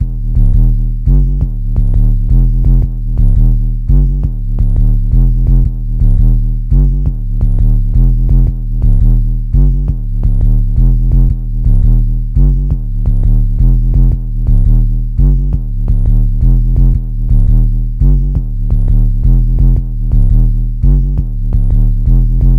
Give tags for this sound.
loop
bass
85bpm

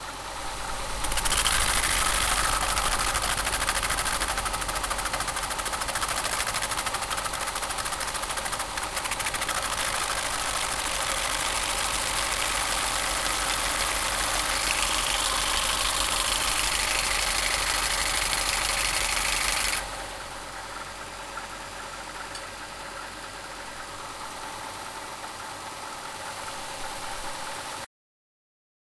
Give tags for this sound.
tool
plastic

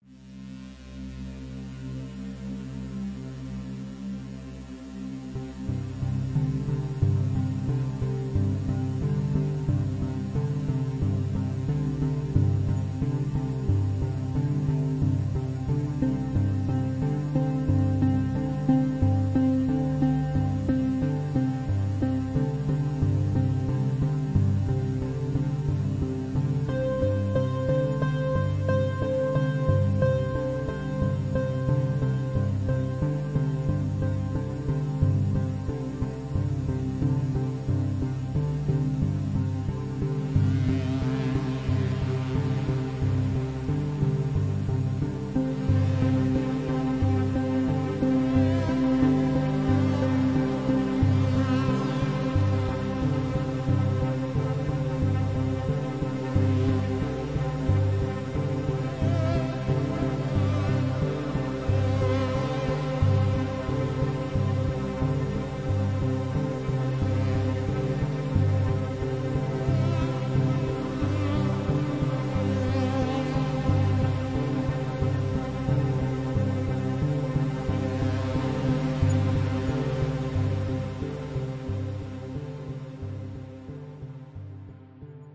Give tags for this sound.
ambient
atmosphere
boring
calm
chill
chillout
downtempo
electronic
inspirational
meditation
minimalistic
music
peaceful
relax
relaxing
robot
sleep
synthesizer

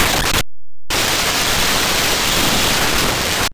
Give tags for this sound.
8bit
c64
glitch

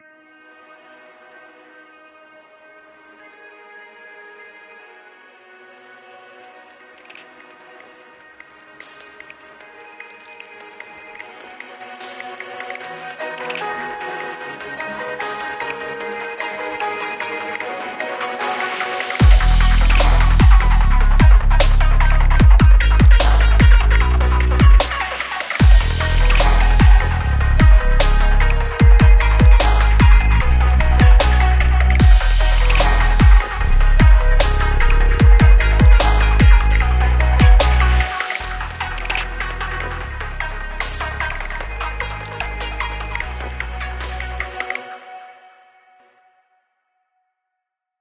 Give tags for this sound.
ambient
synth
bass
150bpm
electronic
150-bpm
neuro
electro
music
loop

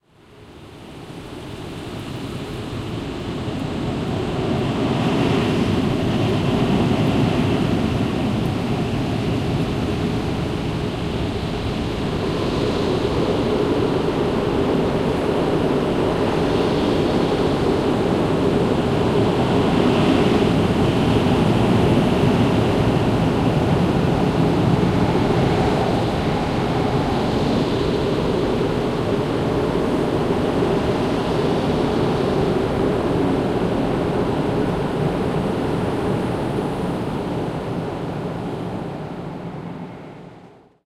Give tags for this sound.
Drone
ambience
ambient
atmosphere
noise
sci-fi
sound-design
soundscape

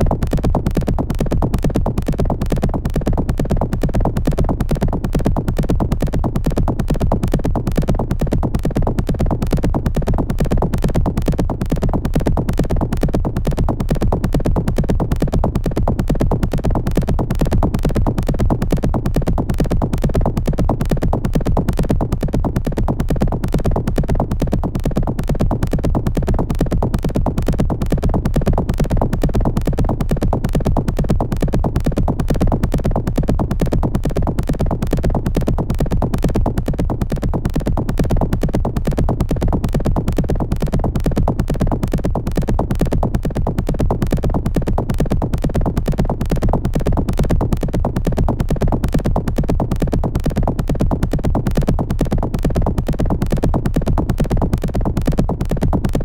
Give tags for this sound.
loop
techno

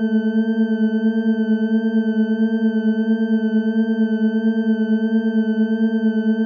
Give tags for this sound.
sample
8
Hover
Tone
bit
retro

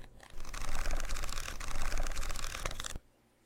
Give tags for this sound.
flick
turn
flip
page
reading
read
paper
shuffle
book

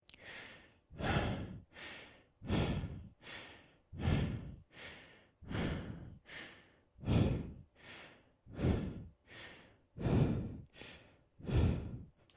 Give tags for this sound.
breathing
Person

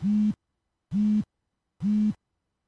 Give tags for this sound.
mobile
phone
vibrate
vibrating
watch

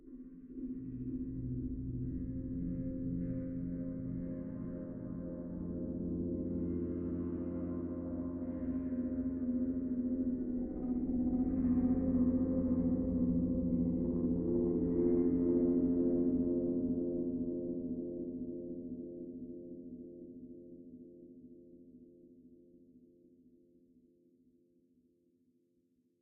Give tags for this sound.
experimental
drone
soundscape
ambient
evolving
space
pad
eerie

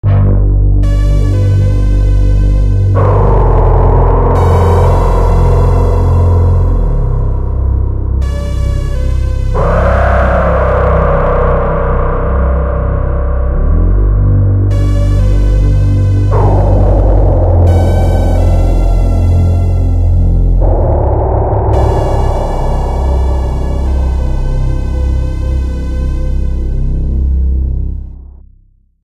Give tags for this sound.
Moog
modelD
soundscape

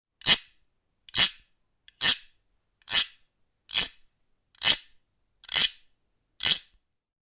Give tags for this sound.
bamboo
drum
friction
guiro
idiophone
instrument
percussion
rythm
wood
wooden

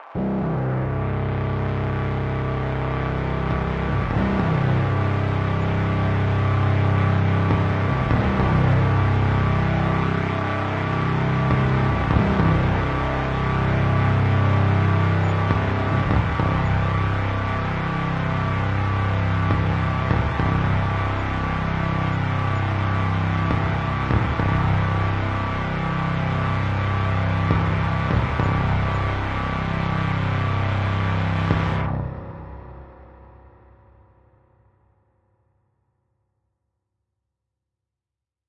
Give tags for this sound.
sci-fi
amp-sample
white-noise
space
space-ambience
speakers
experimental
noise
audio-distortion
sample
distortion
lo-fi

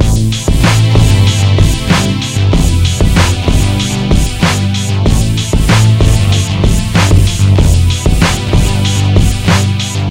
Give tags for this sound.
bass
beat
chords
drum
drums
lo-fi
loop
rap
rhyme
sample

